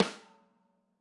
Drumkit using tight, hard plastic brushes.
brush, snare